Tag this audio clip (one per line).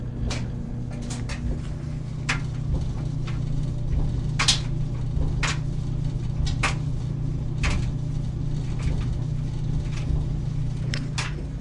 Basement
Laundry
Housework
Clothes-Dryer
Clothes
Click
Tumbler
Motor
Machine
Appliance